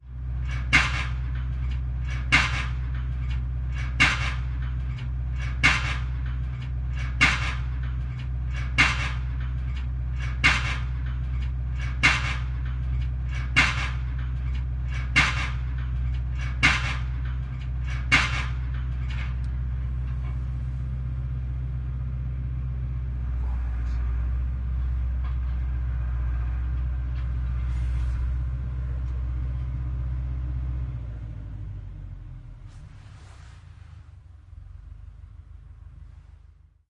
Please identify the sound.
A stereo field-recording of a tractor mounted, hydraulically operated fence-post driver. Rode NT-4 > Fel battery pre-amp > Zoom H2 line-in.
bang, bangs, clang, clangs, diesel, field-recording, machine, machinery, mechanical, post-driver, stereo, tractor, whack, whacks, xy